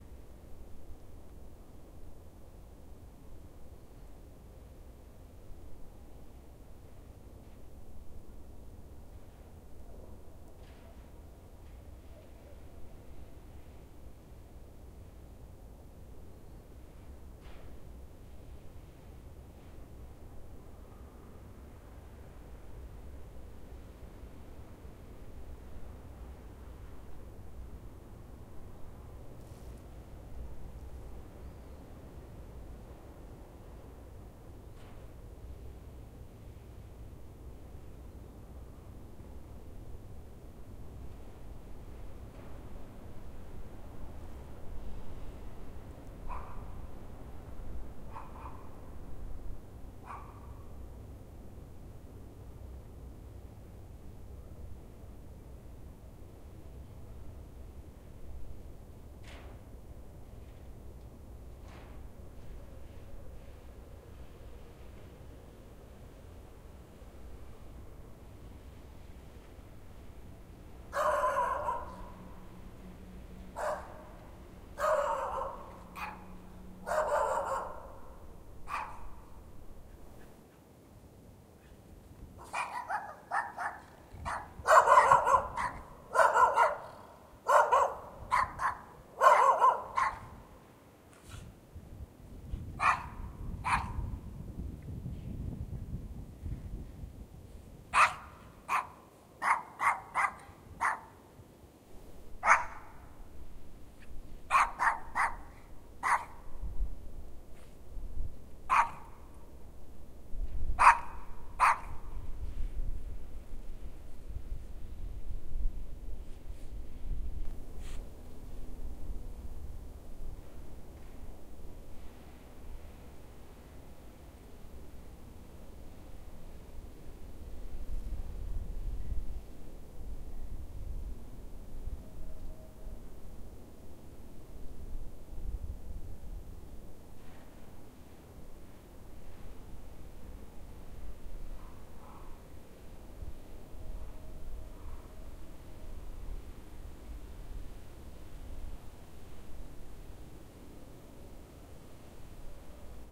Winter early morning. About 5:30 am. Freshly snow. Janitor removes snow. Barking of the two small dogs. Voices from far (1.4 km) railroad crossing.
Recorded: 24.01.2013.